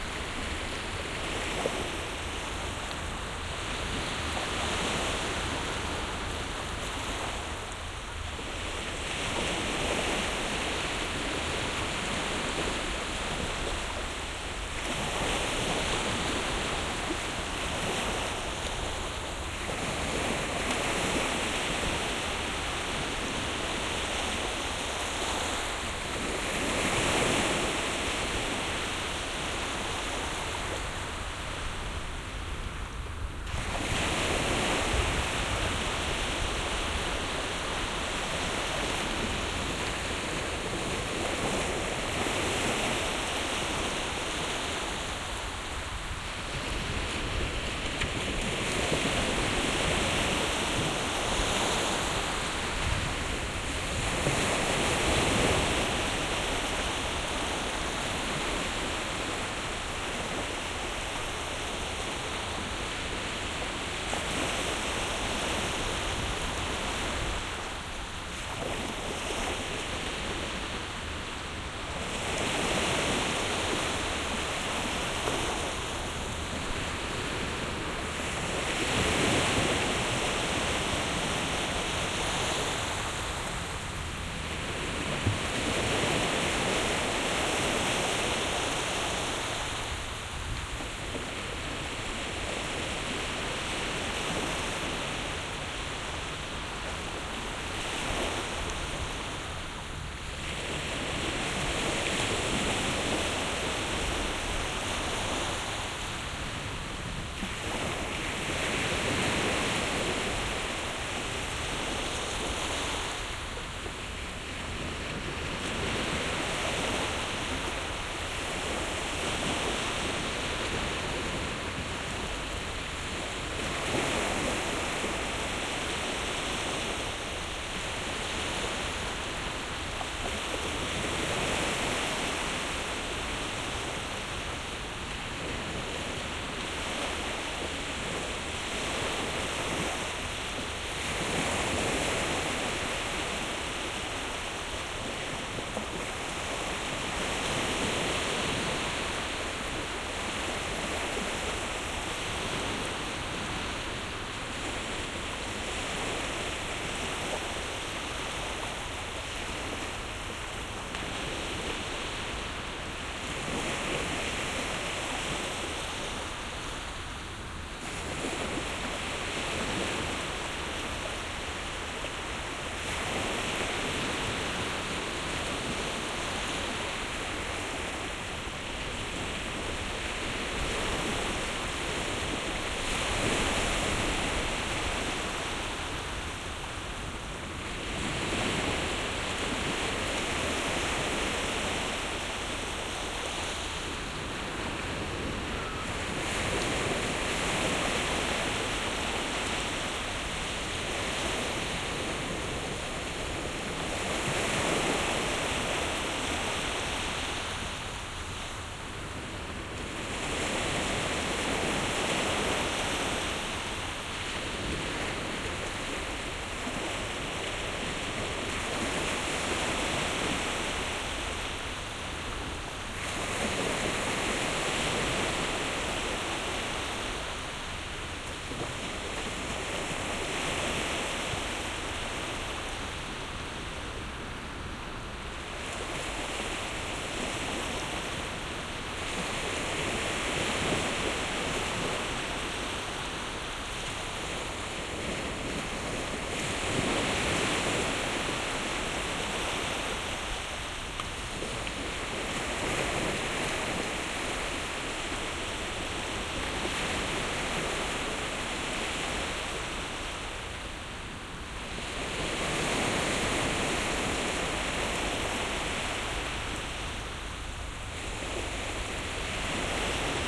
Waves, shore, surf
Binaural recording of the surf at Vlissingen, nightfall. Recorded with Roland Roland CS-10EM going into a Sony ICD SX-2000.
wave, surf, ocean, coast, shore, beach, sand, water, coastal, sea, seaside, waves